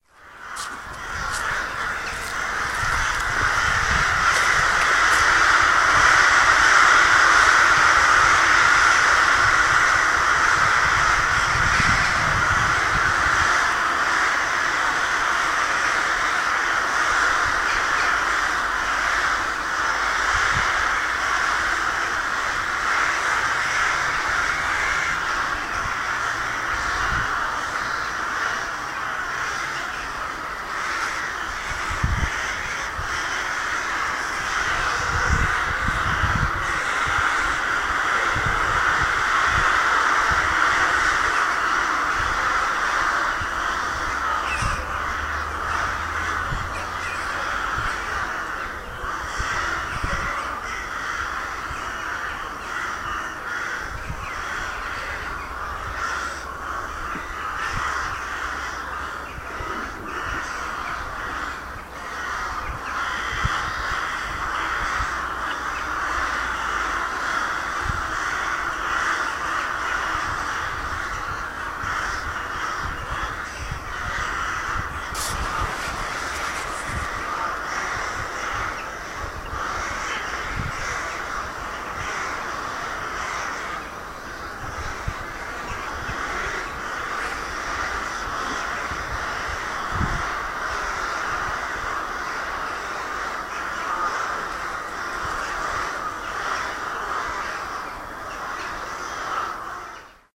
Crows and Rooks 4
Rook and Crow call under the nesting area of many Rooks
bird, birds, birdsong, crow, crows, field-recording, forest, magpie, nature, rooks, spring